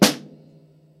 Snare Drum sample with Beyerdynamic-TG-D70
Snare Drum sample, recorded with a Beyerdynamic TG D70. Note that some of the samples are time shifted or contains the tail of a cymbal event.
Beyerdynamic-TG-D70, dataset, sample, snare